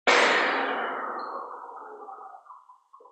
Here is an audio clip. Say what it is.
Recording of a hand dryer coming to a halt, processed with a noise limiter, rendering an abstract slow clapped reverberation
abstract, clap, filtered, reverb, shot, slap